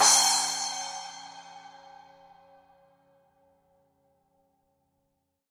X-Act heavy metal drum kit. Octagon Signature F 12". Recorded in studio with a Sennheiser e835 microphone plugged into a Roland Juno-G synthesizer. Needs some 15kHz EQ increase because of the dynamic microphone's treble roll-off. Each of the Battery's cells can accept stacked multi-samples, and the kit can be played through an electronic drum kit through MIDI.
cymbal, drum, heavy, kit, metal, octagon, rockstar, splash, tama